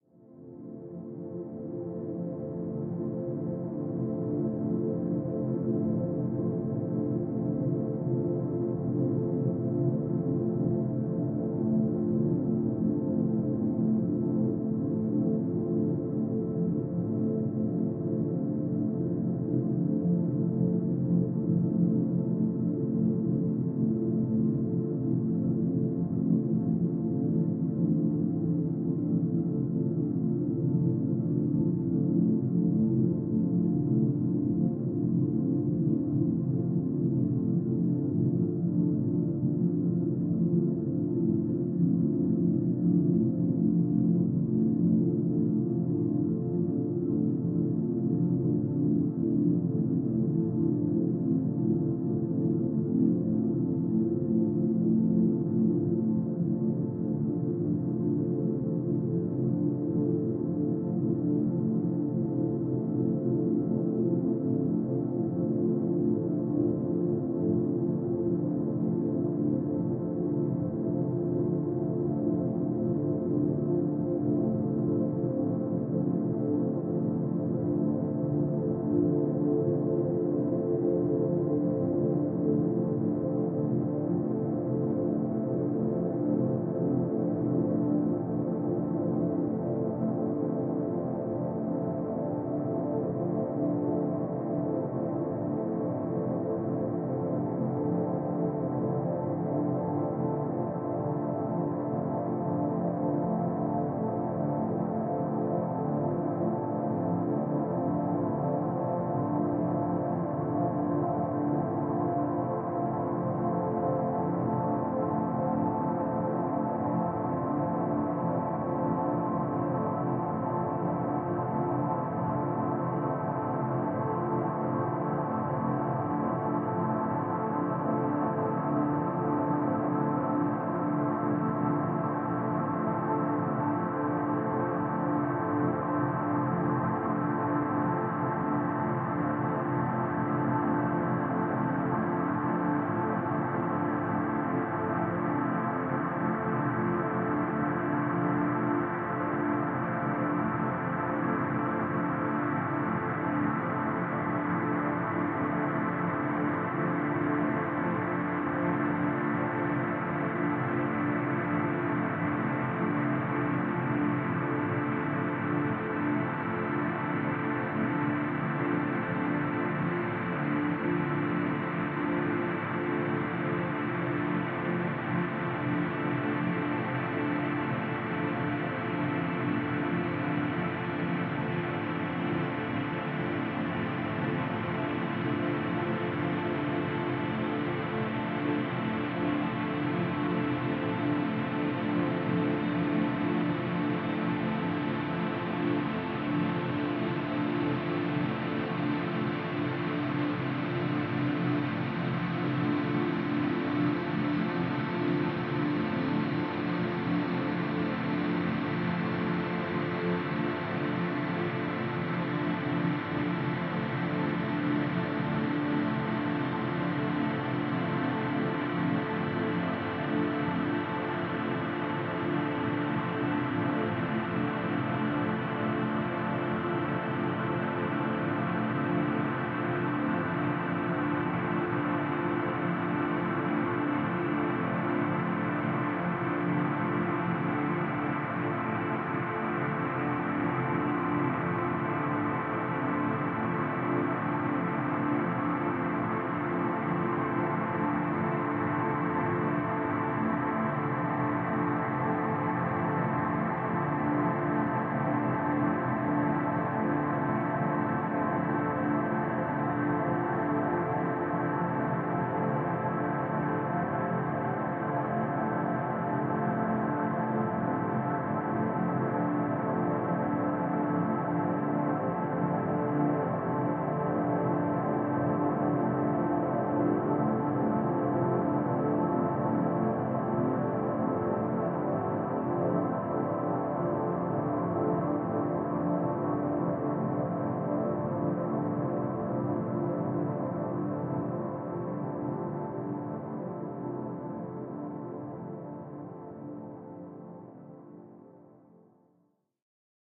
Ambience 08. Part of a collection of synthetic drones and atmospheres.
ambient, drone